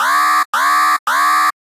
1 alarm long c
3 long alarm blasts. Model 1